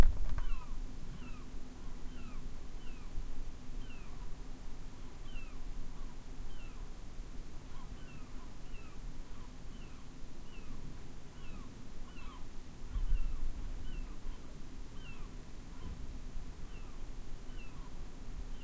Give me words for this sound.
ES Seagulls from Window 01
A recording of seagulls done with a Zoom H4N.